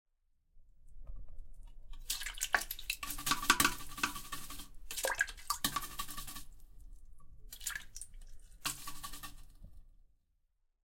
cleaning toilet
house, housework, cleaning